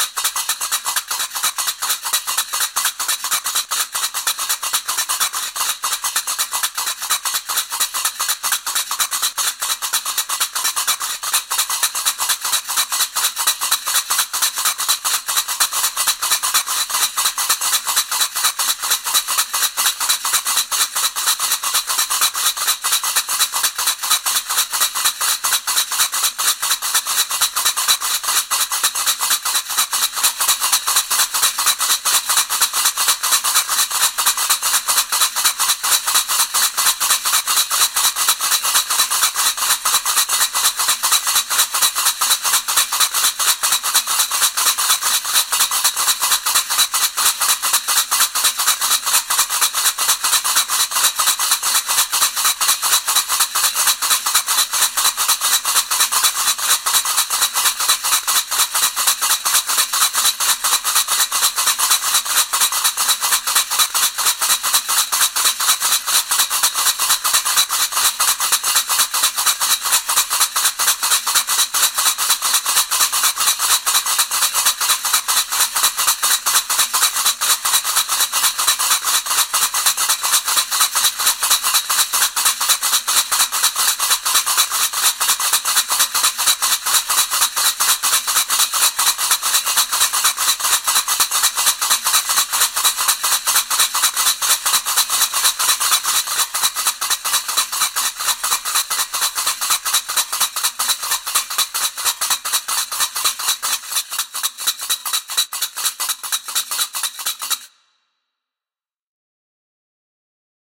Krakebs127bpm
6 takes recorded in MS stereo with AKG 414 and Octava Omni of me playing Krakebs, overdubbed, panned and mixed in Protools.
gnawa, Krakebs, MSstereo, overdubs, Qarqebs